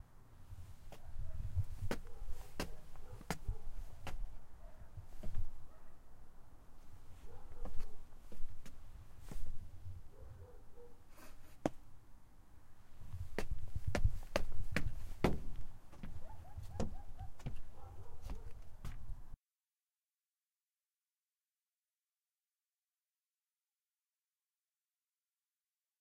Backyard Wooden Stairs
Steps on some back patio-like wooden stairs.
back, steps, wooden, yard